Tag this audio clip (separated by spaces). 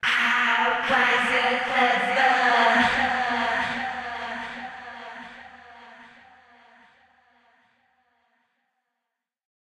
variety synth crushed gritar distort bit guitar blazin